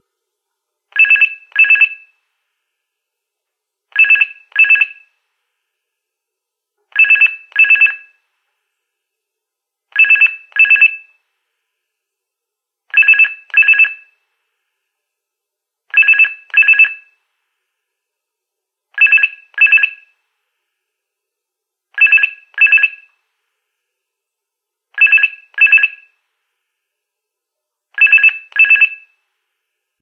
Telephone Ring UK 2
A stereo recording of an UK telephone ringing (cut to loop). Rode NT 4 > FEL battery pre-amp > Zoom H2 line in